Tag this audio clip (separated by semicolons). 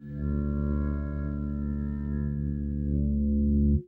experimental; note; bowed; string; real